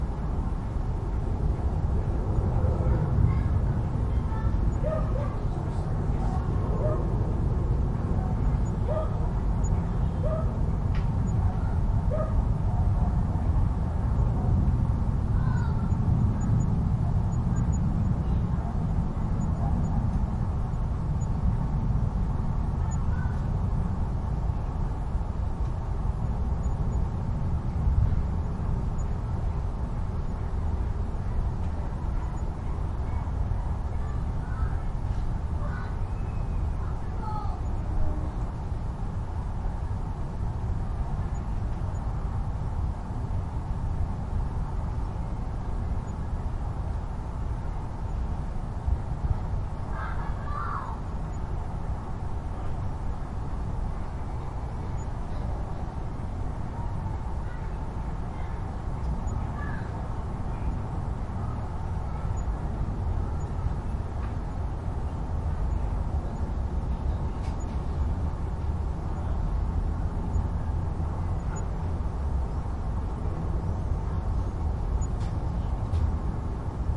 Kids a few houses away playing football in their garden. Some dog barking. Background noise from airplanes, distant traffic, birds sounds from our own garden and the dog.
Recorded on a nice summar day, 21-Jul-2016, with a Zoom H1.